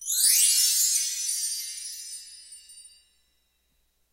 chimes 2+sec gliss up
Rising glissando on LP double-row chime tree. Recorded in my closet on Yamaha AW16-G using a cheap Shure mic.